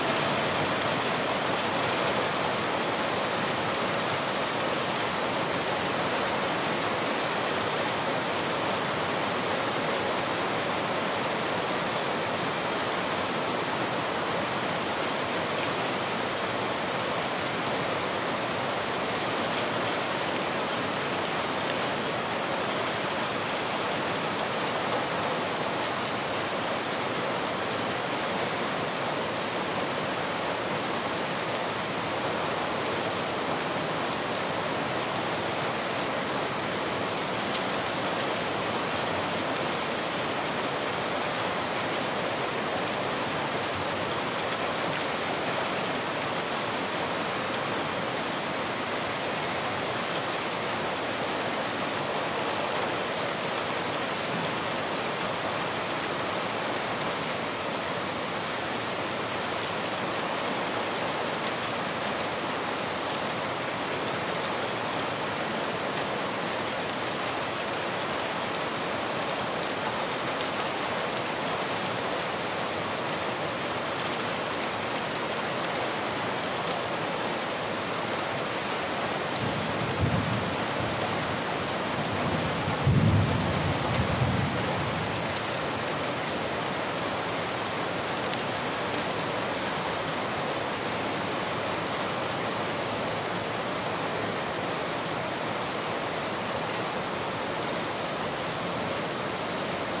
Heavy rain with thuder
A clean recording with heavy rain and thunder sounds at the end of the recording.
Recorded with Tascam DR 22WL, windscreen and tripod.